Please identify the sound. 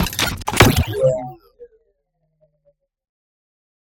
SciFi Gun - Plasma Hyper Lance 1
Hyper Lance 1
custom NI Razor patch